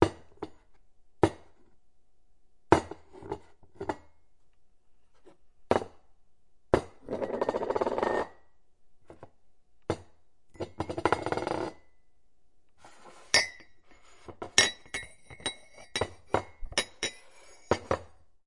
dish - ceramic cup sounds
Hitting a ceramic cup on the counter, spinning it, then hitting it on other dishes.